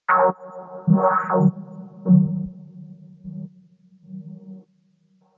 MA SFX SinusGlitches 4
Sound from pack: "Mobile Arcade"
100% FREE!
200 HQ SFX, and loops.
Best used for match3, platformer, runners.
8-bit, abstract, digital, effect, electric, electronic, freaky, free-music, future, fx, game-sfx, glitch, lo-fi, loop, machine, noise, sci-fi, sfx, sound-design, soundeffect